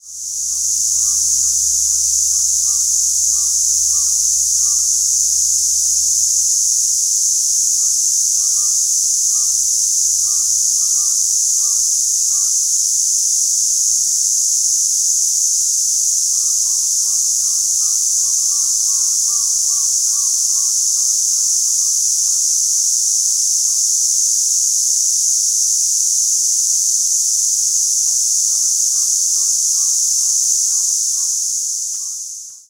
Cicadas GeimoriSapporoHokkaido
Field recording around Sapporo city university at Geimori area Sapporo, Hokkaido. The buzzing of cicadas. Recorded by Roland R-05.
wooded-area, nature, roland-r-05, japan, field-recording, hokkaido, cicadas, sapporo, geimori